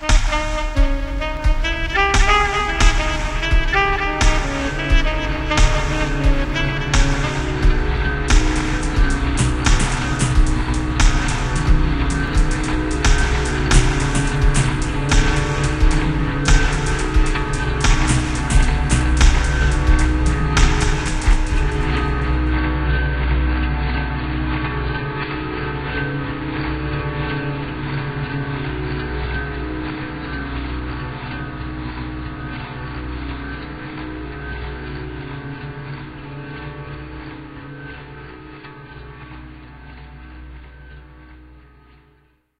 Flowers Intro kkz+sleep+elmomo
remix of elmomo's "Sax Orient fragment" with accents of sleep's 90_bpm_nylon2, and kkz's ReDub loop, but without the guitar chops and slowed down a bit, I also used sliced snares out of david d's phat-hop samples.
guitar, beat, jazz, remix, slow, brass, soundscape, atmosphere, saxophone, ambient, processed